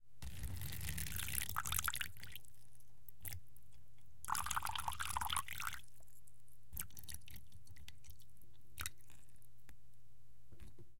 Pouring Water 01
Someone pouring water.
Pouring, liquid, water